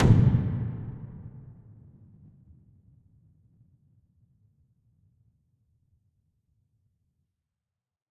Frequency Impact 08
Synthesized using Adobe Audition